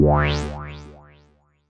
wah synth sound mad with Alsa Modular Synth
wah, synth